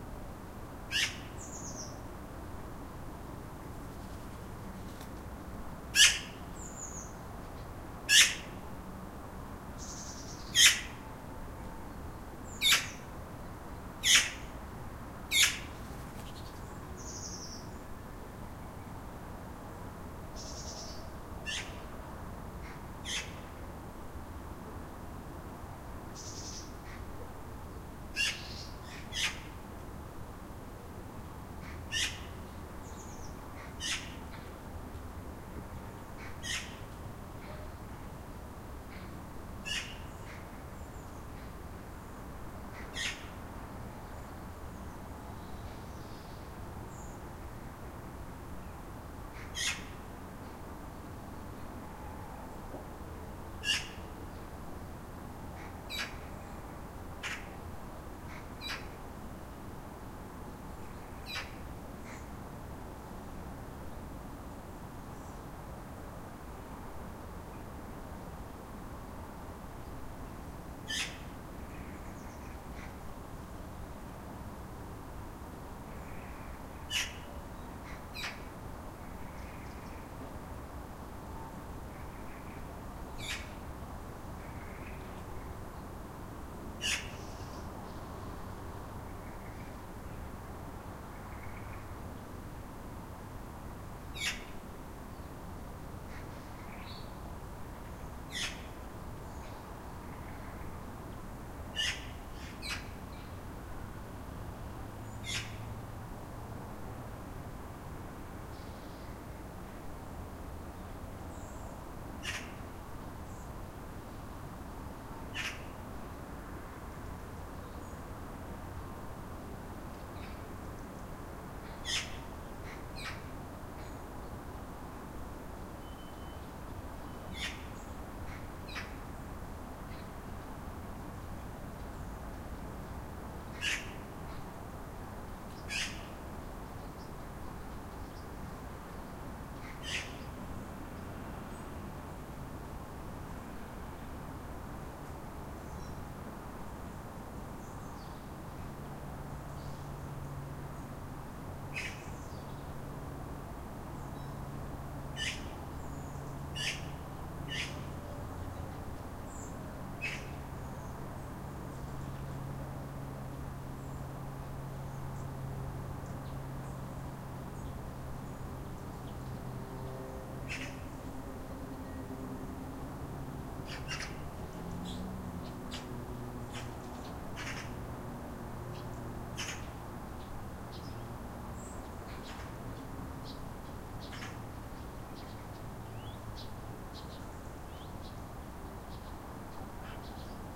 Another recording with my latest toy: the Zoom H2, again with the build in microphones, this time a magpie had to "talk" into the recorder.